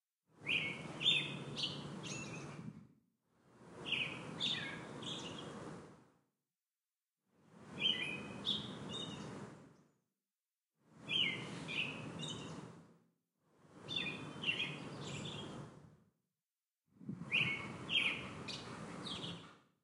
bird chirps5
A bird in my local park singing early one morning. Probably a Robin.
ambient, bird, bird-chirps, birds, birds-chirping, chirp, chirping, chirps, nature, park, robin, spring, tweets